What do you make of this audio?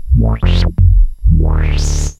handplayed bass sounds on a korg polysix. fast and short played with cutoff and resonance variables.
bassline, korg, synthe